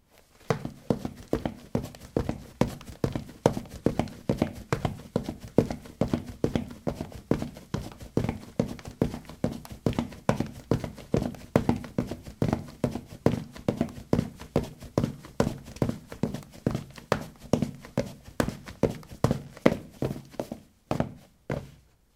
ceramic 14c lightshoes run
Running on ceramic tiles: light shoes. Recorded with a ZOOM H2 in a bathroom of a house, normalized with Audacity.
footstep, footsteps, steps